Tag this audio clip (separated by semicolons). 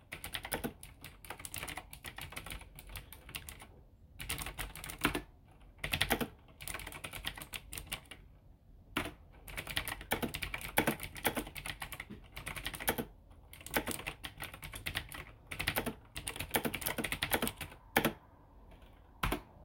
Clicking; Computer; Keyboard; Typing